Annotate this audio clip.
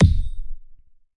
I randomly synthesized a series of percussive hits with xoxo's physical modeling vst's than layered them in audacity
bass-drum, bassdrum, bd, house, kick, kit